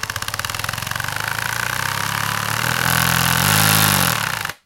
Drill Held Rise to Stop
Bang, Boom, Crash, Friction, Hit, Impact, Metal, Plastic, Smash, Steel, Tool, Tools